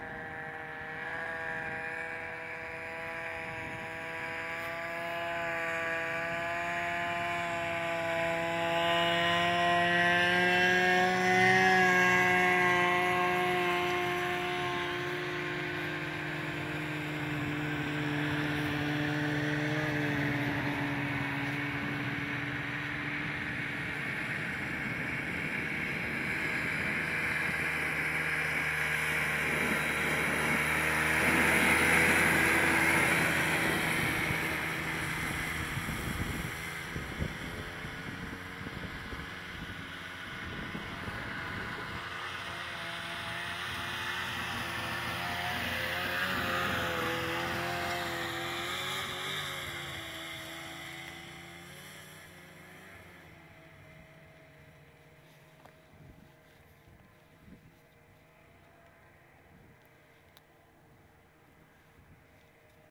snowmobiles pass by far